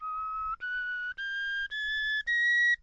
sheepbone flute
This is a scala from a flute made of sheep bone.
bone, flute, sheepbone